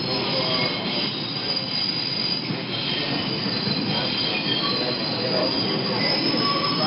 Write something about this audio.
NYCsubway scraping
Sound of subway wheels scraping on the tracks. Recorded with iPhone 4S internal mic.
NYC, NYC-subway, scraping, scraping-sounds, subway, subway-scraping